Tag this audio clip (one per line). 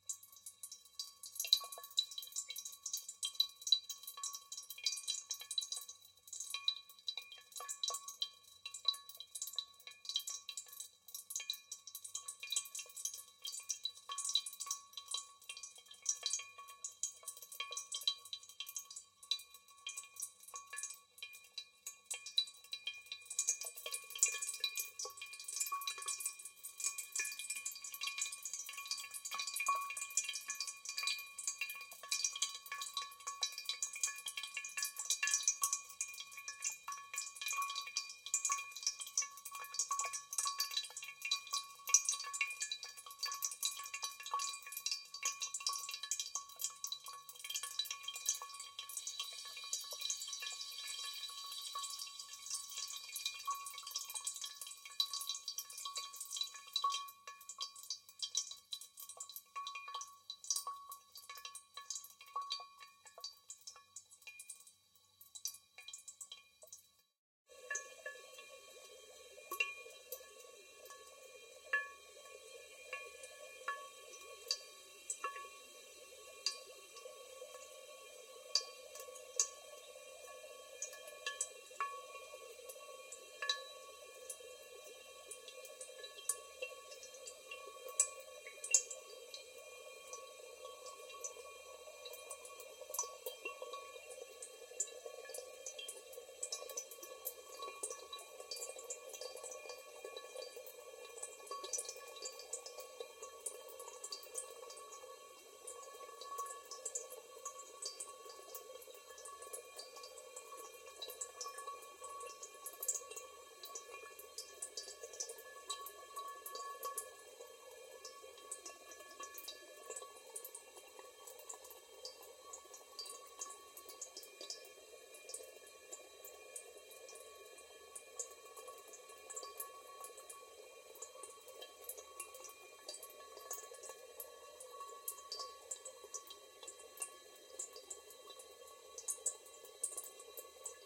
recording
running-water
sfx
tap-water
vase
water